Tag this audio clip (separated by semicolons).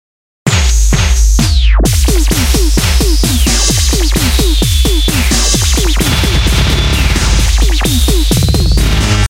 bass; dance; electronic; hat; kick; loop; music; rave; sci-fi; snare